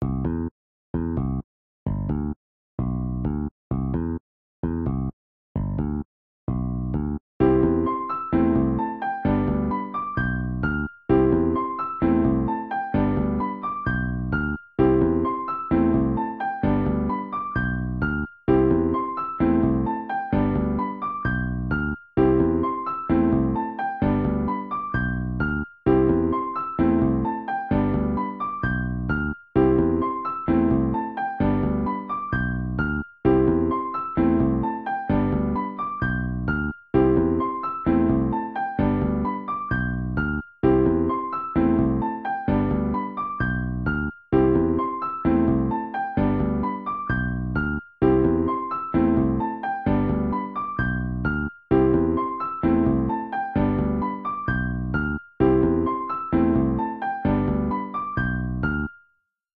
A happy loop music with piano and bass